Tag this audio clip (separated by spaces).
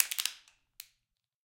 bin bottle c42 c617 can chaos coke container crash crush cup destroy destruction dispose drop empty garbage half hit impact josephson metal metallic npng pail plastic rubbish smash speed thud